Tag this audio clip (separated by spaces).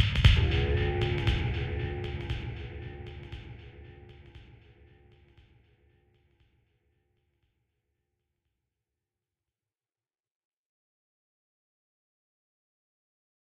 fx voice mystical hit